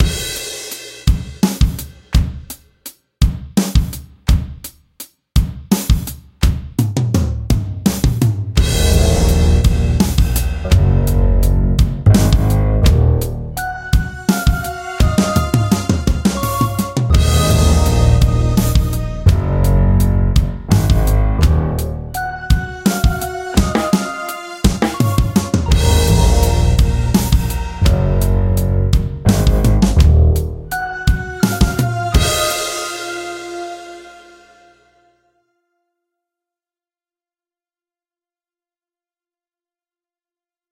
Shades of pure evil
Some music I made in GarageBand for a thing called Victors Crypt!
It's a drumbeat with an eerie dark piano with brighter synth-tones coming in.
I believe it would fit anything creepy, scary, spooky, haunted, fantasy, horror, terrifying, dystopia or whatever comes to mind.
Piano, Ghost, Creepy, Atmosphere